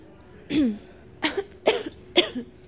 Paisaje sonoro del Campus de la Universidad Europea de Madrid.
European University of Madrid campus soundscape.
Sound of cough
sonido de tos